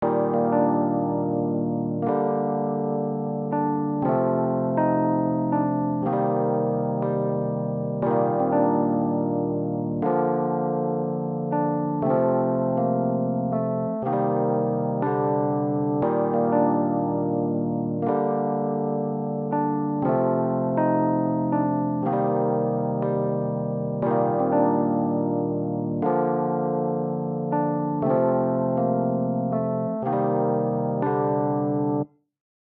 morning- epiano riff 1
Chords are D#maj7, Gm, F, Cm7. 120 bpm.
Progression, Chords, Soft, Chill, E-Piano, Piano, Preset, Lo-Fi, Full, Advanced, Bells